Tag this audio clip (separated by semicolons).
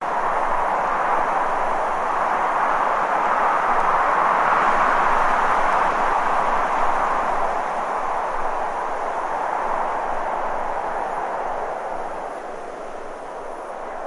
moving; rustling; leaves